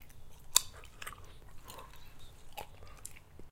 chewing sweet
Chewing a hard Jelly sweet.